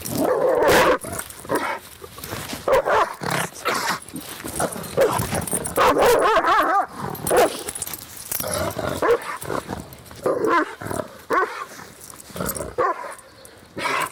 This is a very angry dog trying to start a fight. He gets close to the microphone a couple of times, which results in some distortion.